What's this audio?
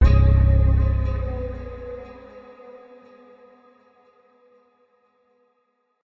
a soft, haunting chord with a bit of guitar
horror, digital, haunted, sci-fi